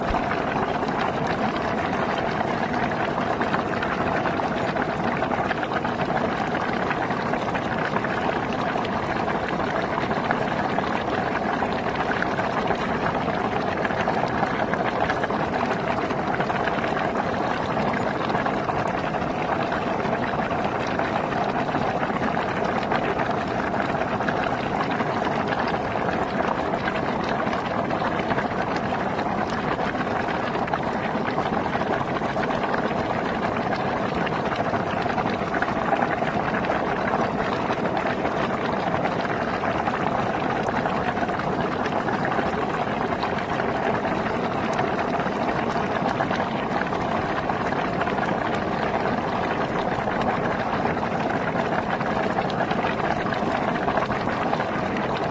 20090828.krisuvik.hotspring.01
fast bubbling from a sulphur, bad-smelling hot spring at Seltun, in the Krisuvik geothermal area, Iceland. Shure WL183, FEL preamp, Edirol R09 recorder
bubbling, field-recording, geothermal, hotspring, iceland, nature, volcano